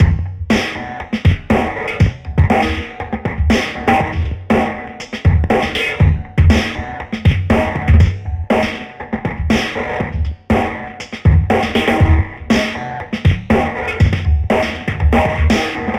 Straight drumbeat at 120 bpm programmed with Addictive drums, trashed with iZotopes Trash, processed with a combdelay in Reaktor 5 and a flanger in Logic 8.
120, bpm, comb, delay, distorted, drumloop, drumloops, fat, flange, flanger, processed, trash